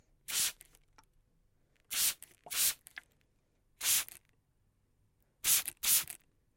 Sprayer zilch single and twice.
Mic: Pro Audio VT-7
ADC: M-Audio Fast Track Ultra 8R
zilch, water